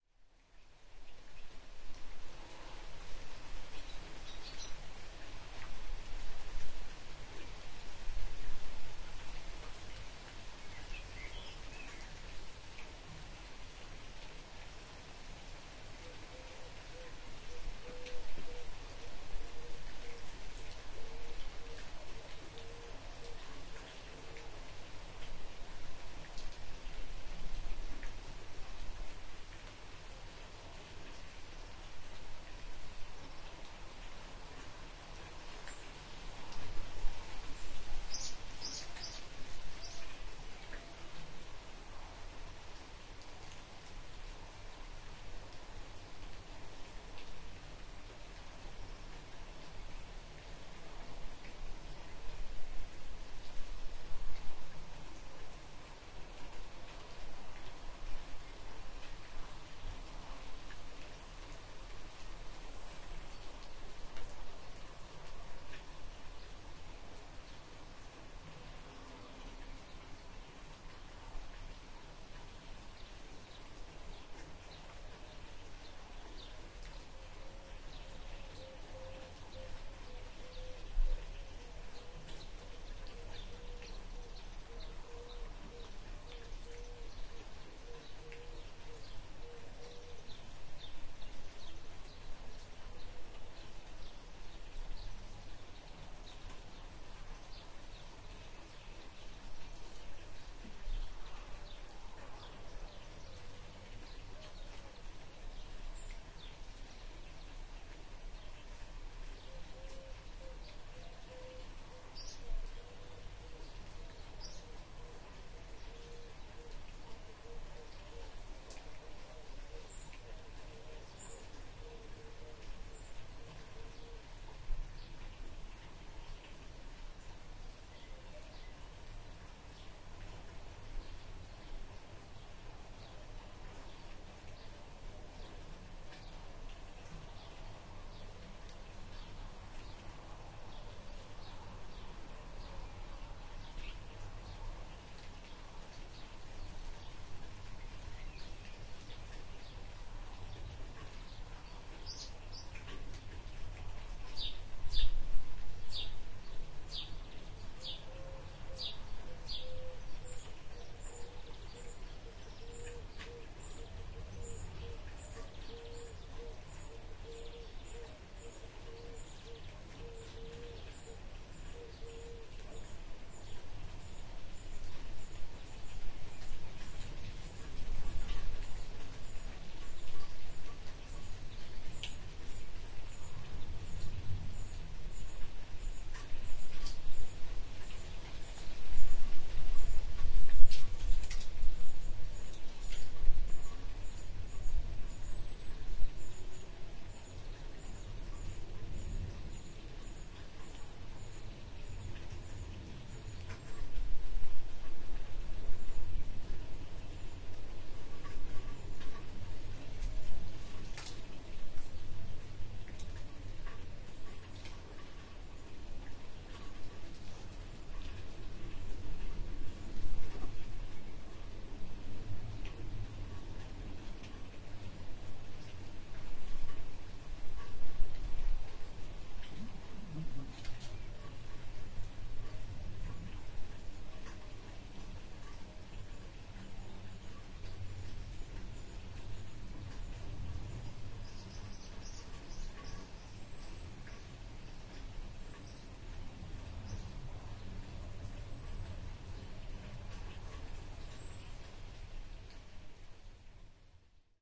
Rain Birds 1
birds, rain